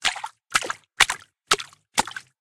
Water Footsteps
footstep, steps, water, walk, footsteps